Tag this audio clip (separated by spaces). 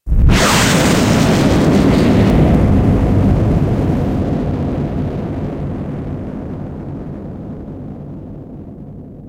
Missle Launch Rocket